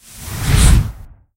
Fireball Cast 3
Casting a Fireball Sound
Recorded with Rode SE3
Used foil, plastic bags, brown noise and breathing gently into the microphone layered together using reverb for the tail and EQ to push the mid-low frequencies.
Cast, Fireball, Spell